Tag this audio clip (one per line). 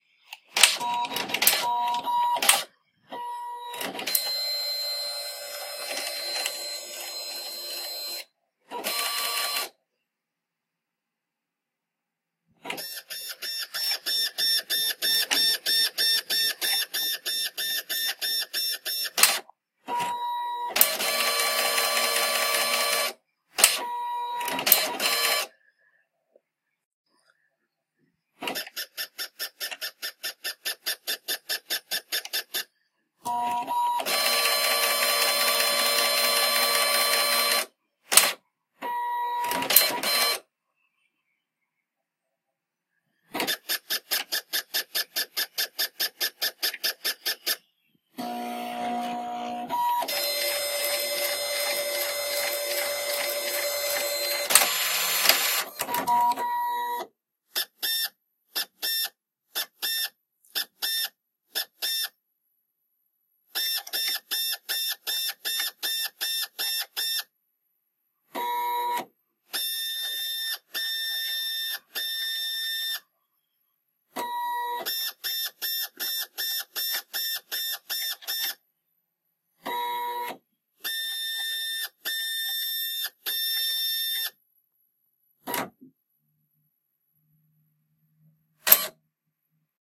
600
color
device
epson
inkjet
noisy
office
old
printer
printing
stylus